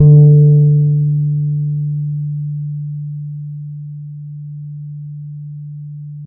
A 1-shot sample taken of a finger-plucked Gretsch Electromatic 30.3" (77 cm) scale length bass guitar, recorded direct-to-disk.
Notes for samples in this pack:
The note performances are from various fret positions across the playing range of the instrument. Each position has 8 velocity layers per note.
Naming conventions for note samples is as follows:
BsGr([fret position]f,[string number]s[MIDI note number])~v[velocity number 1-8]
Fret positions with the designation [N#] indicate "negative fret", which are samples of the low E string detuned down in relation to their open standard-tuned (unfretted) note.
The note performance samples contain a crossfade-looped region at the end of each file. Just enable looping, set the sample player's sustain parameter to 0% and use the decay parameter to fade the sample out as needed. Loop regions begin at sample 200,000 and end at sample 299,999.